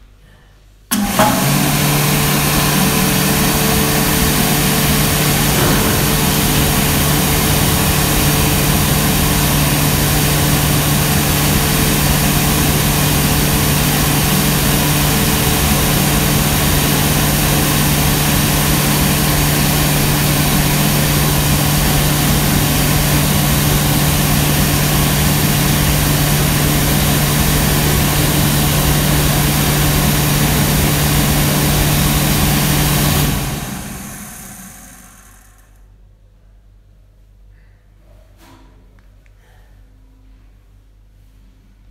Hand dryer in a gas station recorded with a iPhone SE and Apogee iMic
apogee-mic, button, hand-dryer, iPhone